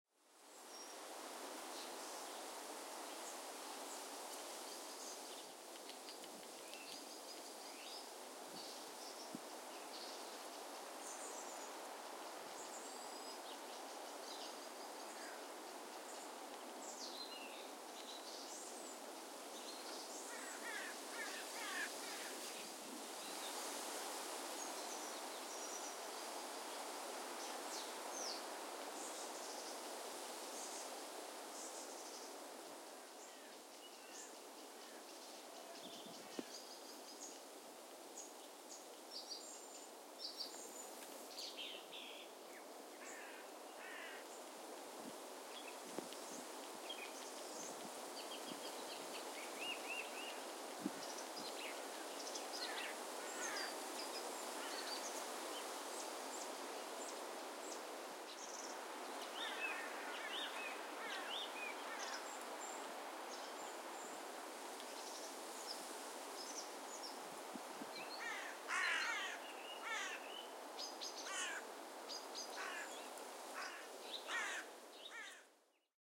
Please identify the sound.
Wind in forest with crows
In the sound recording we hear crows in the forest through which the wind blows